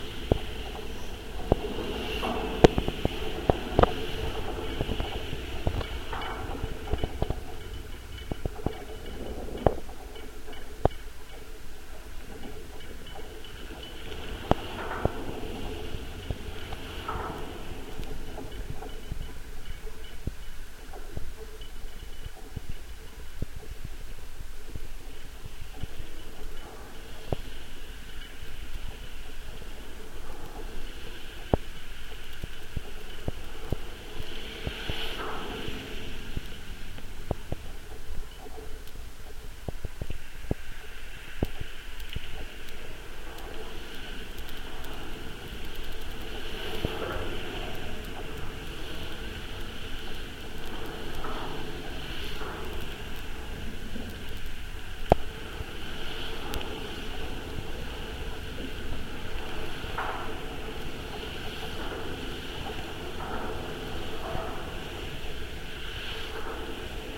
GGB suspender SE16SW
Contact mic recording of the Golden Gate Bridge in San Francisco, CA, USA at southeast suspender cluster #16. Recorded December 18, 2008 using a Sony PCM-D50 recorder with hand-held Fishman V100 piezo pickup and violin bridge.
bridge, cable, contact, contact-microphone, field-recording, Fishman, Golden-Gate-Bridge, piezo, sample, sony-pcm-d50, V100, wikiGong